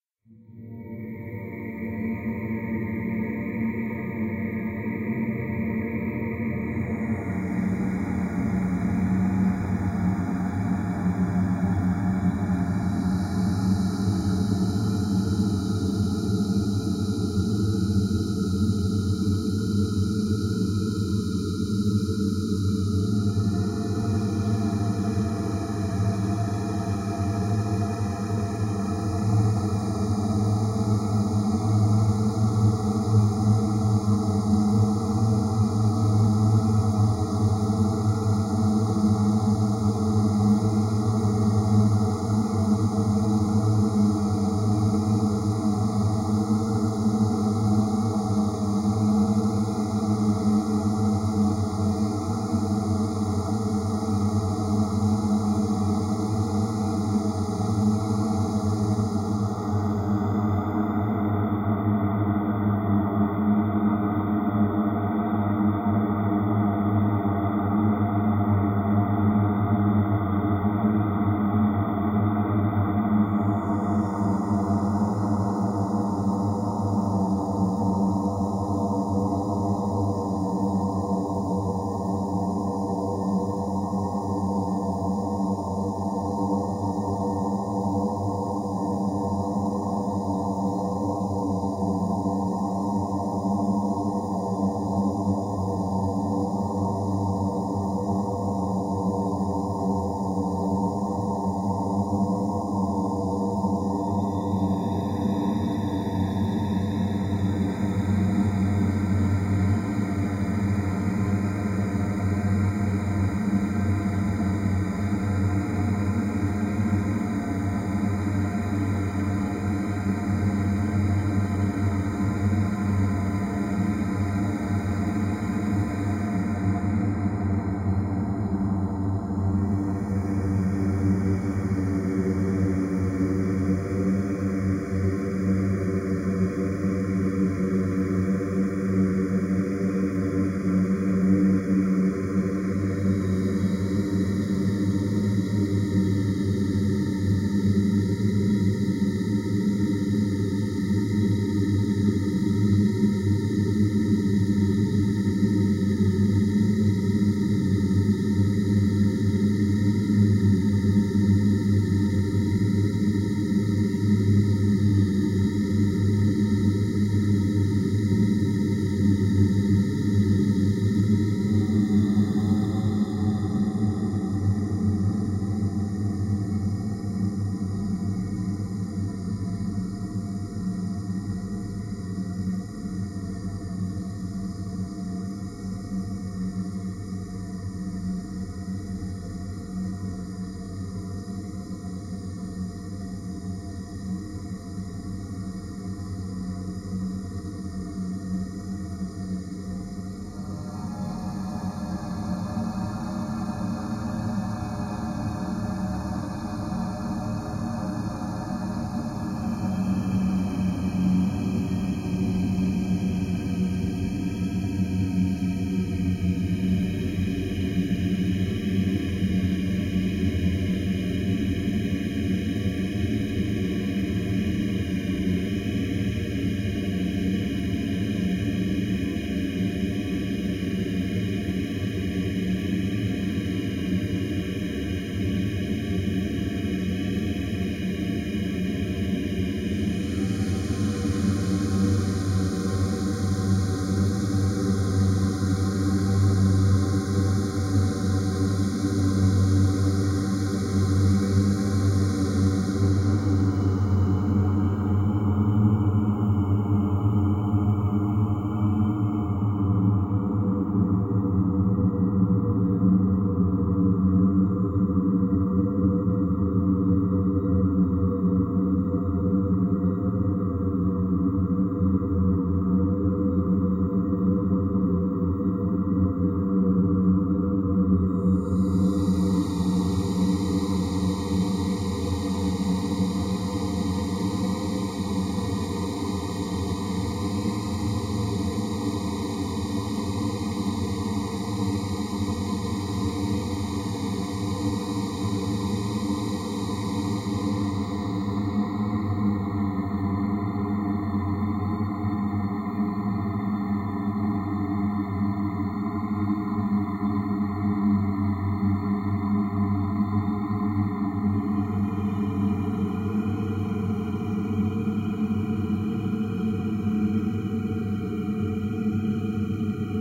dare-26 voicesynth spectral smeared
MBROLA-MB-EN1 voice in eSpeak monologues words randomly generated by Namelur.
Generated babbling is then processed by chain GRM Comb -> GRM Evolution -> UVI SparkVerb.
Some normalizing and hiss reduction applied after effects.
This is alternative realization of toiletrolltube's idea for sound creation with example implementation here:
dare-26, voice, evolving, soundscape